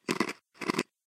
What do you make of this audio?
Crunch : Bone break, walking on snow
Two similar crunches. Aimed at a 'walking on snow' loopable sound.
break, crunch, foot, footstep, footsteps, game-sounds, snow, sound-effect, step, walk, walking